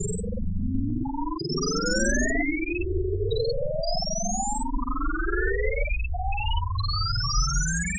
Created with coagula from original and manipulated bmp files. Seductive space frog with deviant paraphilias.
fetish, alien, synth, space, image, frog